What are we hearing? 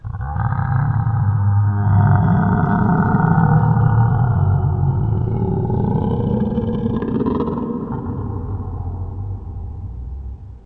Azathoth Calling
An echoing call of the idiot god from the deep recesses of space. This was done by Foley work I was doing for Ballad of the Seven Dice. I modified my voice with numerous effects in Audacity to draw it out and deepen it.
creature, Lovecraft, azathoth, abberation, calling, call, monster